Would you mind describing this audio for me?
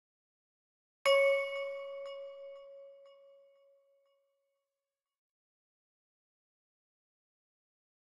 A game/computer pick-up/notification